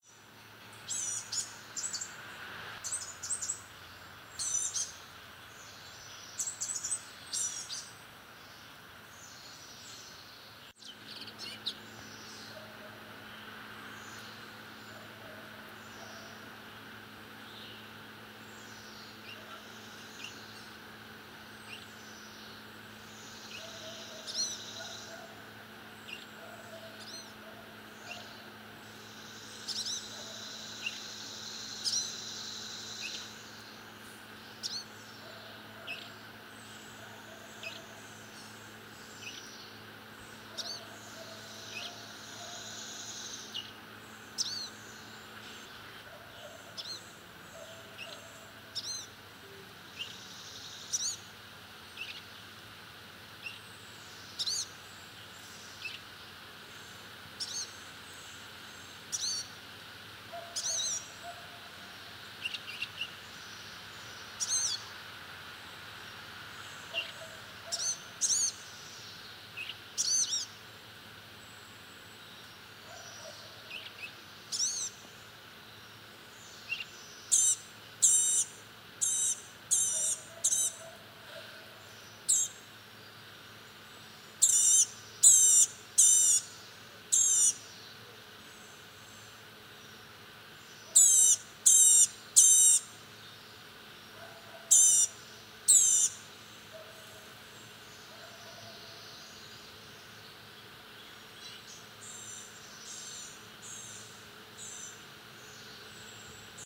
blue-gray gnatcatcher
A blue-grey gnatcatcher in my backyard this evening.
Alabama, animals, bird, birding, birds, birdsong, Blue-gray, blue-grey, close, direct, evening, field-recording, forest, gnatcatcher, high, nature, pitch, recordist, singing, small, spring, summer, tiny, USA, very, vocal, vocalizing